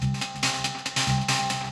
Spring Beats 2
based off of analog beats 2, but added fx to make it sound "springy"
analog, beat, dirty, drum, echo, hat, hi, kick, loop, noise, old, processed, reverb, school, snare, spring, white